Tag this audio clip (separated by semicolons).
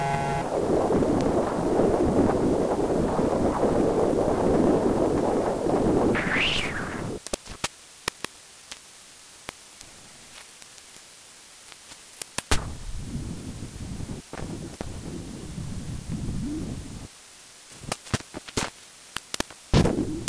neural; recurrent; generative; char-rnn; network